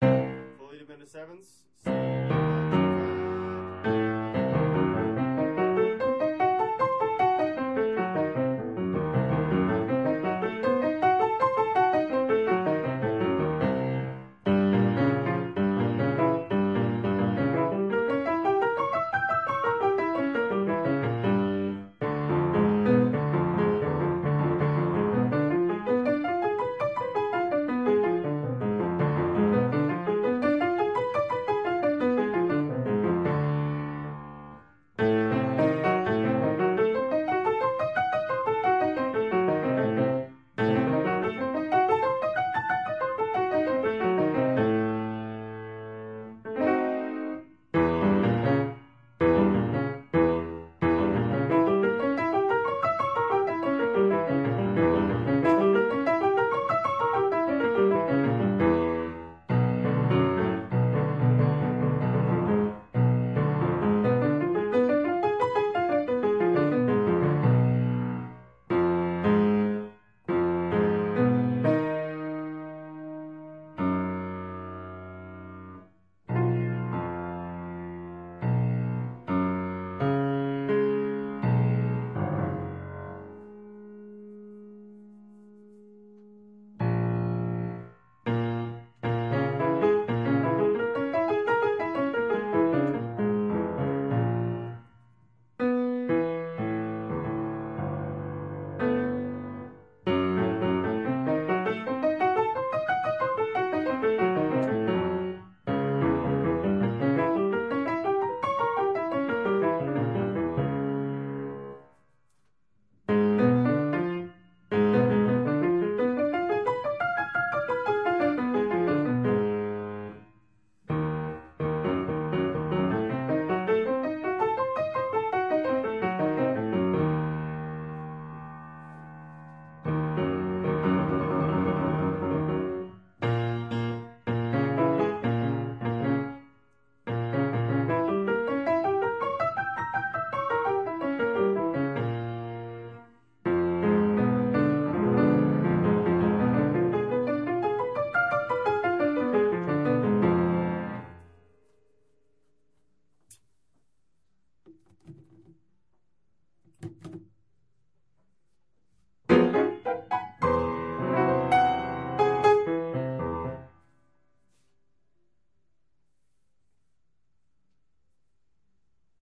Practice Files from one day of Piano Practice (140502)